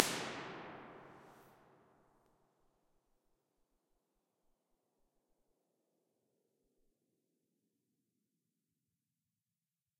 Finnvox Impulses - EMT 3 sec
Finnvox, studios, convolution, ir